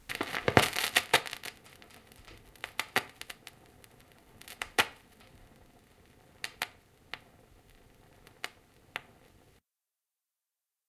Vinyl start crackle 1
A vinyl record starts playing on a record player, plus a slight crackle each time the record goes round (about four times). No music, just the crackling sound!
Recorded in stereo on a Zoom H1 handheld recorder, originally for a short film I was making. The record player is a Dual 505-2 Belt Drive.